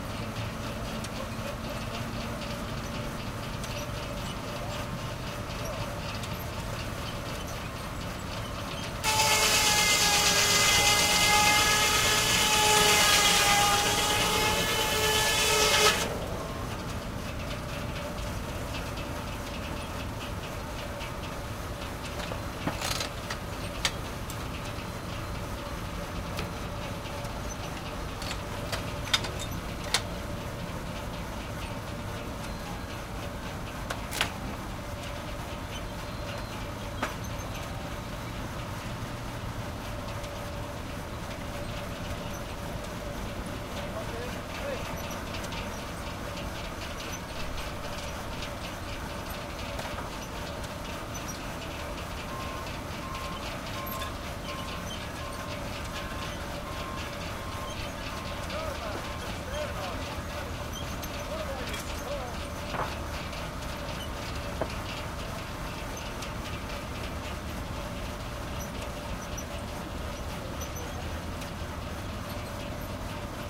antique-sawmill-01
Early 20th century sawmill cutting logs while being powered by an antique steam tractor